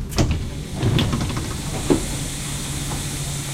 London underground 14 traindoor
London underground, opening train doors.